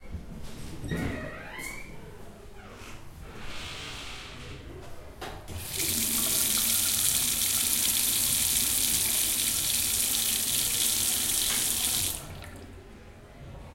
Bar-toilette-ST
Bar toilette. getting in, noisy door, washing hands.
hands, bar, washing, restaurant, toillete